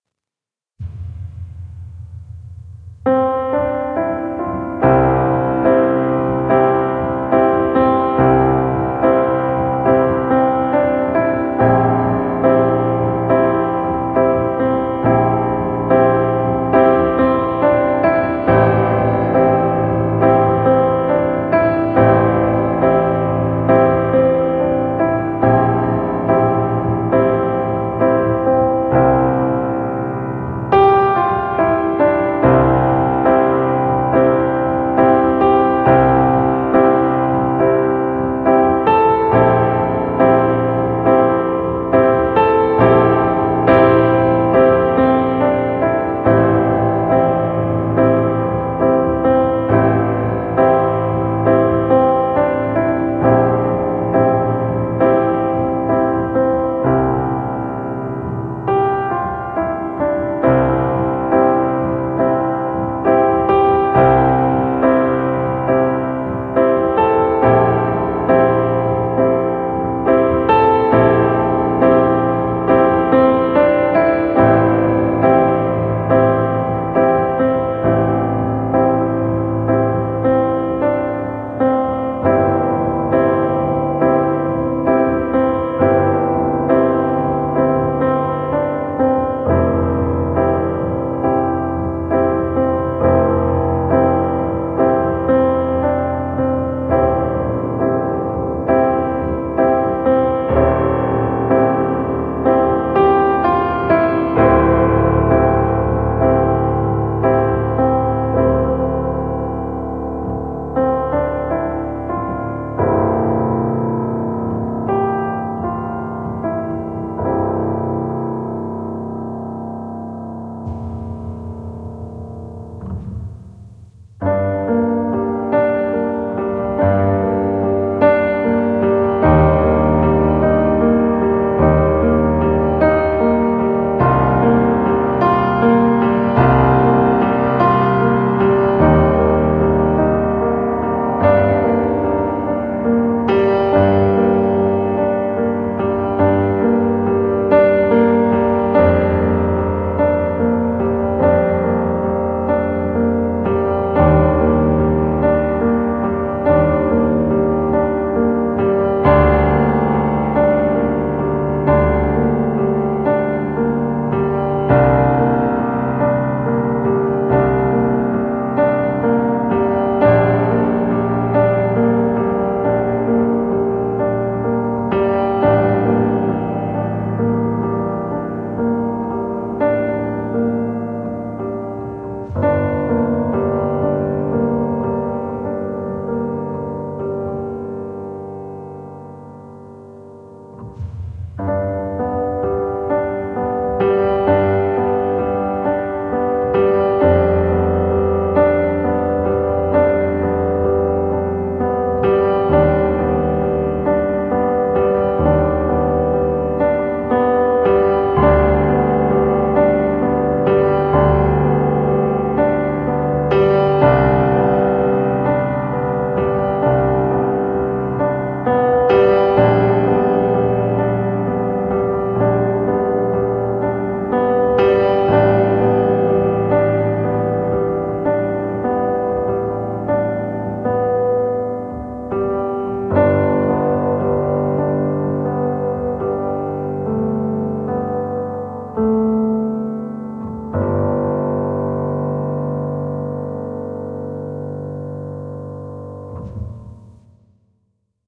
Piano Classical Duo
Two songs I regularly play on my PC setup: M-AUDIO KEYSTATION 49/EZKeys Piano